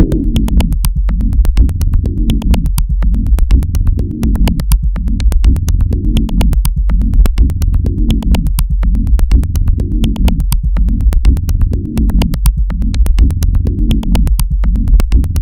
this train is really fast
another train thing. much sub Bass as well! (i like that :)loop made using only free sound sounds.
loops, minimal, machines, industrial, techno